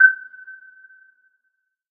it is a metallic kind of hit from Waldorf blofeld